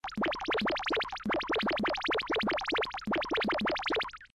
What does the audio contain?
Cartoon hearts overflow
This is the typical sound when an anime character has an aura of hearts around him, sweat drops, or when he glides comically.
animation, anime, cartoon, comedy, funny, silly, squeaky